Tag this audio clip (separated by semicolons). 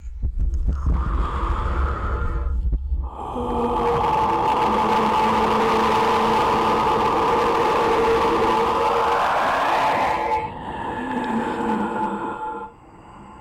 kaoss; musik; noisy; processed; vocals; weird